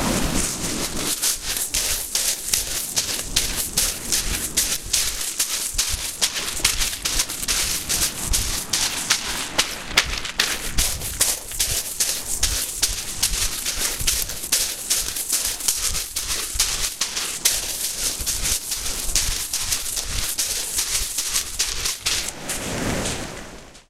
Footsteps on rocky beach at the Channel Coast at Étretat, waves in the background, running speed - recorded with Olympus LS-11
beach, channel-coast, feet, foot, footsteps, running, shore, steps, stone, stones